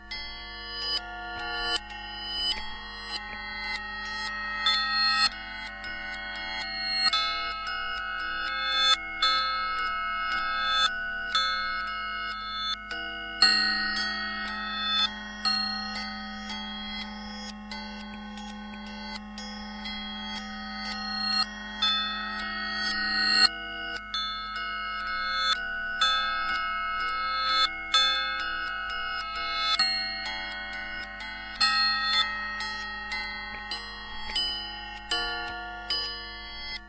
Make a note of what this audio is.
Construction steel bars
Playing on galvanized steel supports for building small houses. Happened to touch one such in a shop for building small houses. Was surprised how nice sounds these raw steels had. Bought some of them, made a rig for holding them, and followed up with doing recordings of the crispy sounds. There are lots of metal stuff in the house building business, which produce harmonic vibrations.